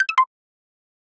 Simple free sound effects for your game!